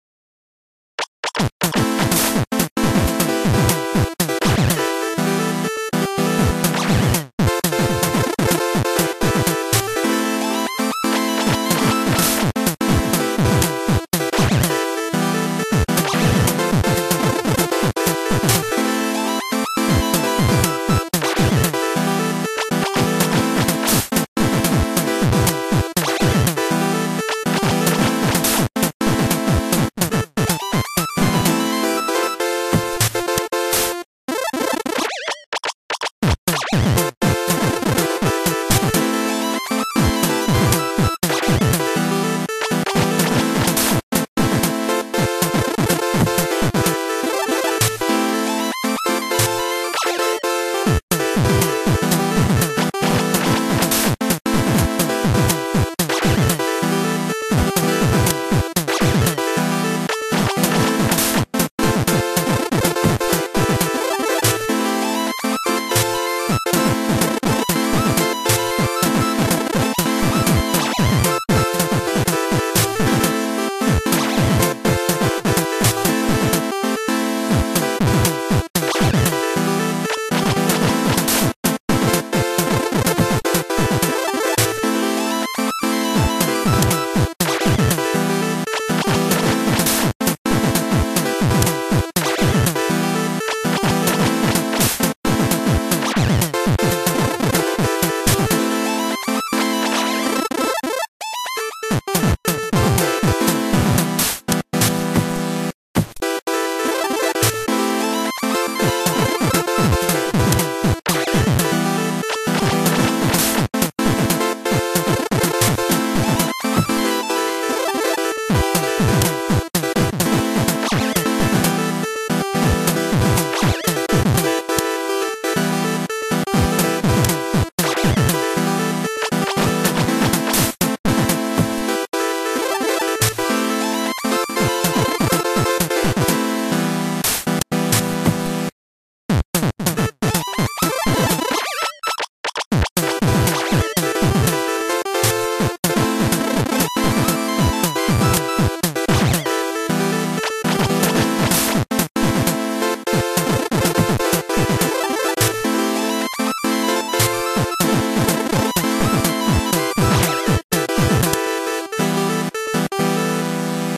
Super Power Fighter is an electronica genre music soundtrack included in the same name music single. Music inspiration came from my childhood days played video games such as; nintendo, segar games with my siblings. We would fight king or queen in each battle of the game. I believed each player wish to possess super power in order to win each battle and be rewarded fir their efforts.
bedroom, composedmusic, eastcore, easylistening, electric, electro, electronic, electronica, enthusiastic, excited, exciting, experimental, hardcore, home-recording, inspirational, instrumental, instrumentals, kid-friendly, motivation, motivational, original, recording, soundtrack, soundtracks, studio